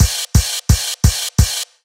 new order blue monday fill
my remake of that iconic fill. i think i’ve got it pretty spot on. thought it might come in handy for some people
130 bpm